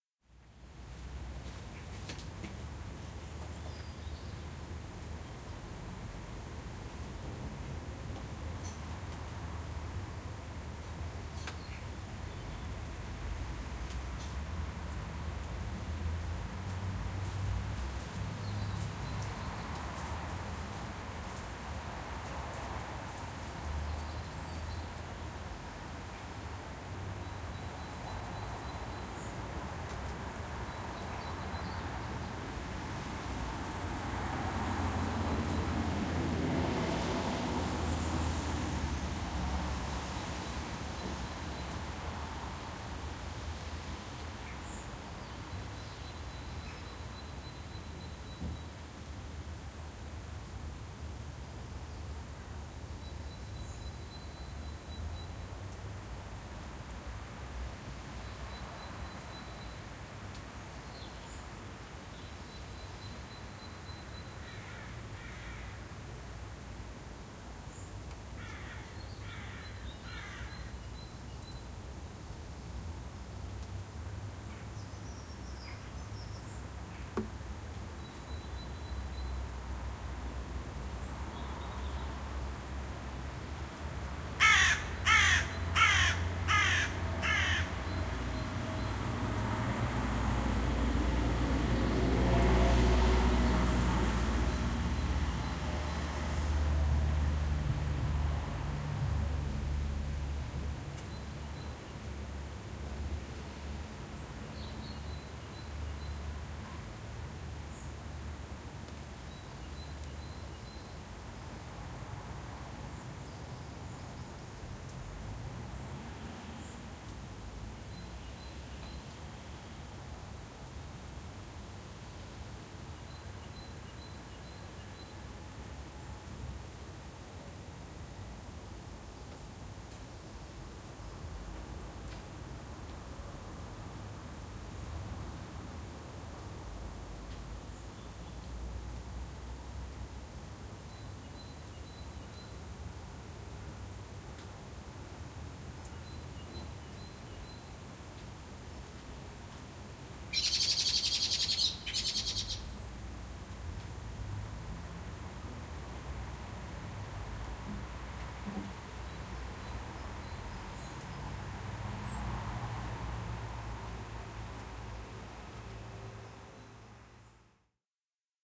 Ochtend september 2010 Zeist traffic birds
Zoom H4n X/Y stereo field recording of residential Dutch ambience.